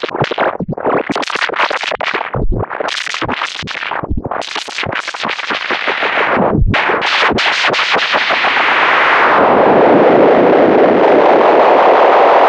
Chaotic delay feedback loop
This seemingly useless sound can be useful creating trashy sounding beats à la Jon Hopkins. Gotta love SoundToys' Echoboy Jr.
chaotic, distorted, gritty, loop, delay, fleepfloop, feedback, chaos, noise, distortion, rewind